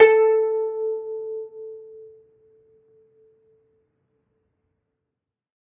single string plucked medium-loud with finger, allowed to decay. this is string 20 of 23, pitch A4 (440 Hz).